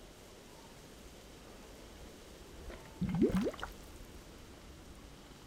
Air escaping from container underwater.